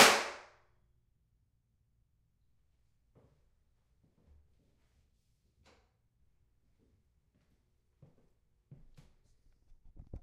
bath mic far
this impulse response was capture inside the 4th floor womens bathroom at the arts place using the zoom h2
space, convolution, reverb, impulse-response, bathroom